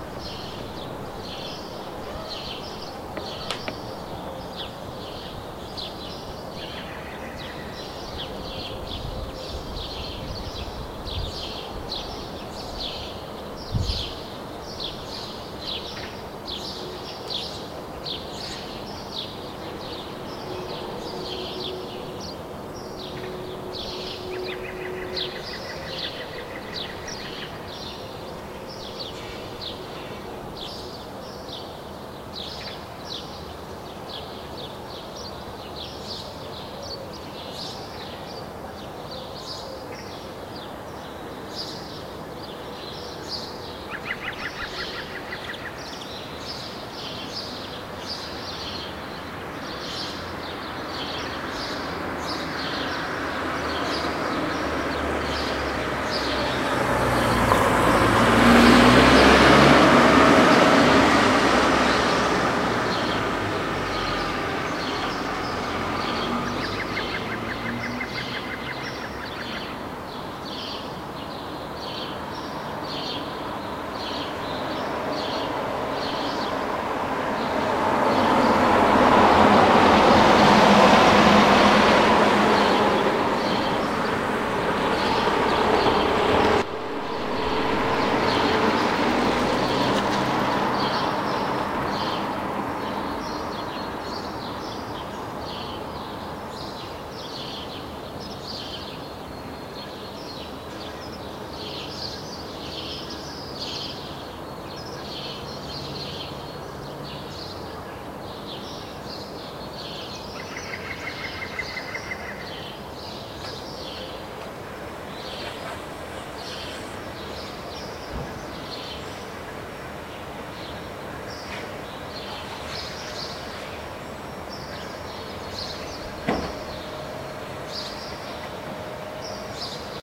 Town Sounds Birds Car 2
A bit more of my boring town. Recorded in the morning 9AM. Recorded with Edirol R-1 & Sennheiser ME66.
birds, car, passing, bird, cars, morning, town, singing, village, nature